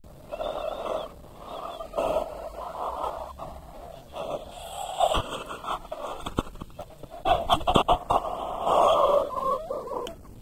scary breath
Creepy, Horror